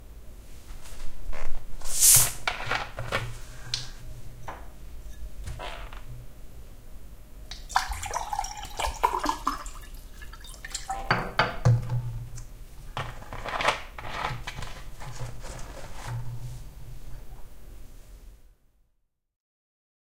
Opening a bottle and serving soda in a glass.
Information about the recording and equipment:
-Location: Home kitchen.
-Type of acoustic environment: Small, diffuse, highly reflective.
-Distance from sound source to microphones: Approx 0.25m.
-Miking technique: ORTF.
-Microphones: 2 M-Audio Pulsar II.
-Microphone preamps/ADC: Echo Audiofire 4 in stand alone mode (SPDIF out).
-Recorder: M-audio Microtrack II (SPDIF in).
Eq: Compensation only for the response of the microphones (compensation of subtle bass roll-off).
No reverb, no compression, no fx.
Domestic-sounds, Field-recording, Head-related-recording